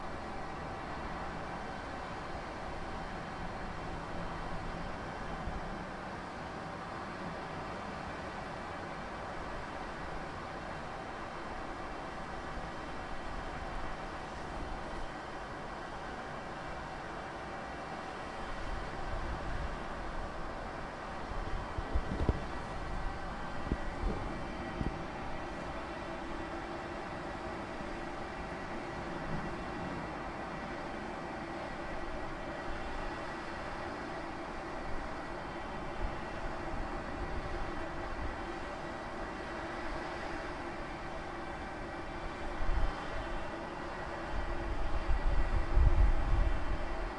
UK Birmingham canal between ICC and Aston, hotel with kitchen extractor fan mounted on roof of restaurant area. Noise on the cusp of being pitched from the cowl, superimposed on the fan motor pitch.
H2 Zoom front mic with wind shield, hand held, some wind noise